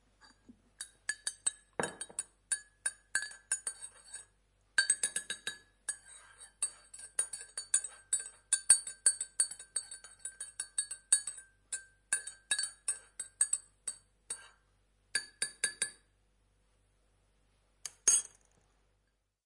Thick Ceramic Mug being Stirred with Metal Teaspoon